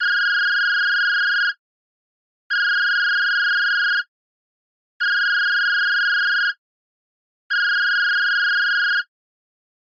Phone Ringing
A simple electronic phone ring made in the Hybrid 3 synthesizer.
call, cell, mobile, phone, ring, ringing, telephone